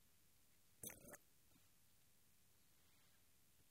A burp. Recorded with a Tascam DR-05 and a Rode NTG2 Shotgun microphone in the fields of Derbyshire, England.